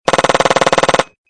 Uzi burst
Simulated UZI sound.
firing, gun, military, shooting, shot, spray, submachinegun, weapon